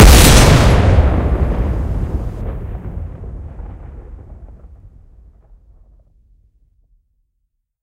A shotgun sound with more bass